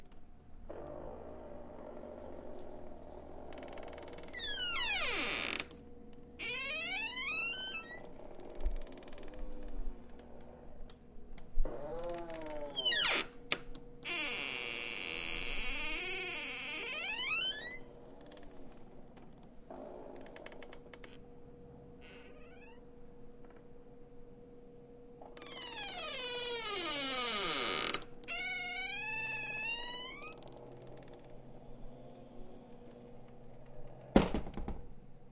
bm doorsqueak
Squeaky door opening and closing several times.
door, squeak, squeaky-door